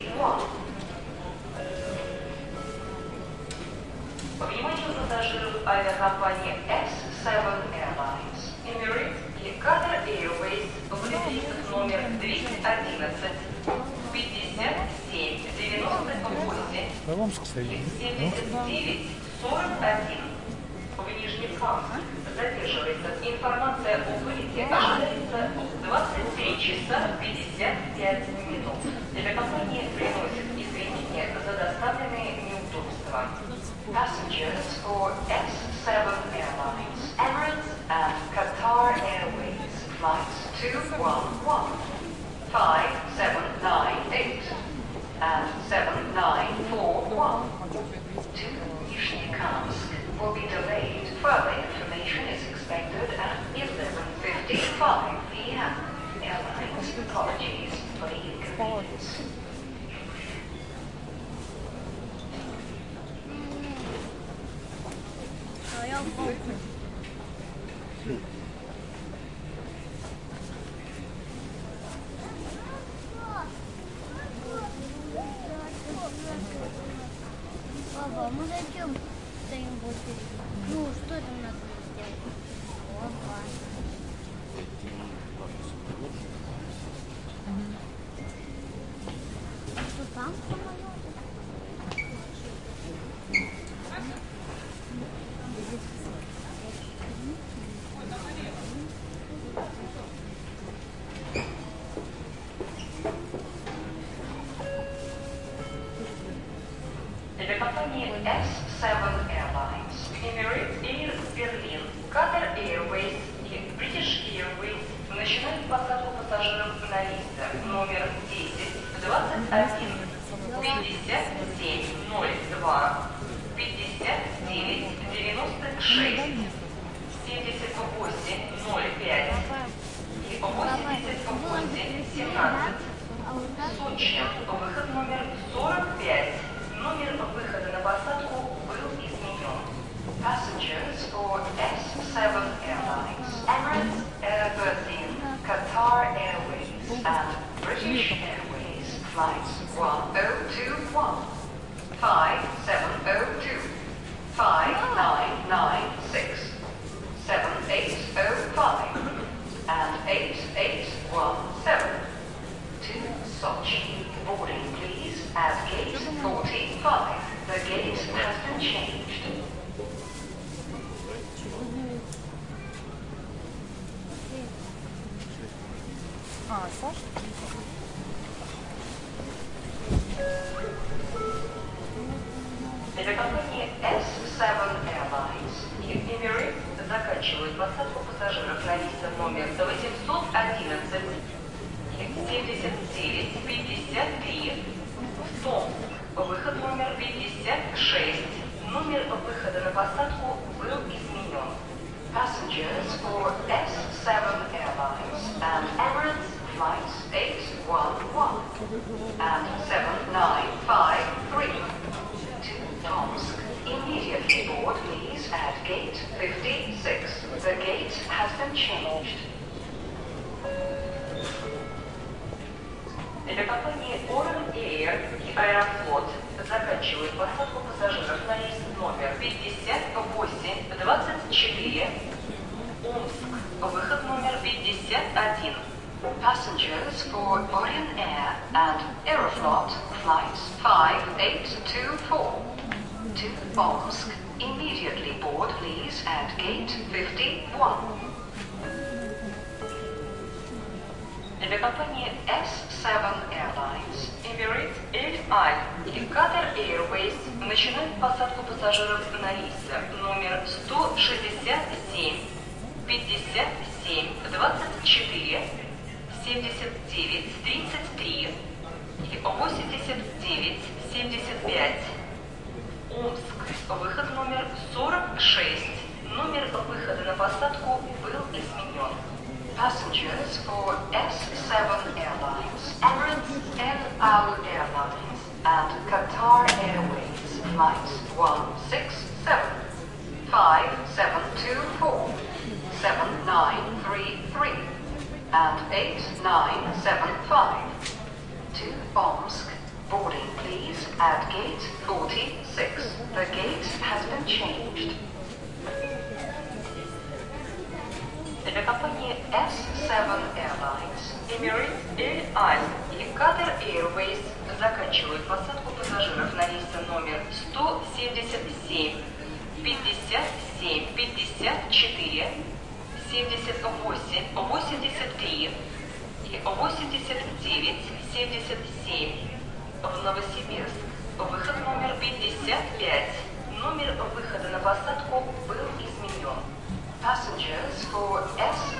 Atmosphere in the Domodedovo airport. Announcement departures.
People talk.
Date: 2016.03.07
Recorder: Tascam DR-40
airport, people, announcement, Domodedovo, noise